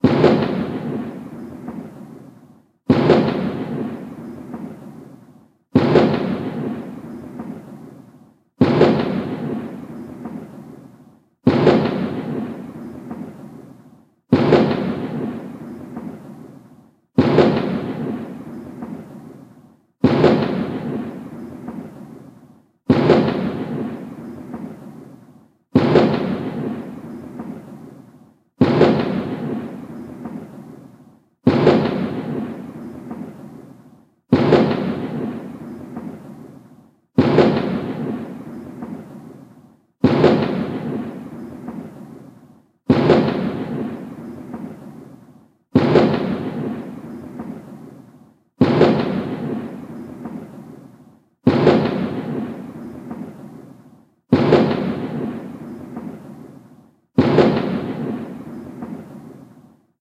21 Boom Salute
1 Minute sample loop of a fireworks explosion field recording, Easter Saturday, Neutral Bay, Sydney, Australia.
21-Gun, Ringtone, Australia, 21-Gun-Salute, Australian-Government, Boom, Cannon-Fire, Cannon, City-of-Sydney, Fireworks, 21, Fire, Firework, Minute, Salute, Sydney, City, Gun, 1-Minute